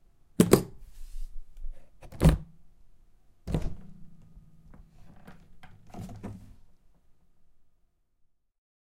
Opening guitar case and taking the guitar
This sound was recorded in my bedroom. It was recorded on May 19th between 12:00 and 13:00 with a Zoom H2 recorder. The sound consists on a guitar case being open and the guitar structure sounds when I picked it up.
box, case, door, Guitar, opening, UPF-CS12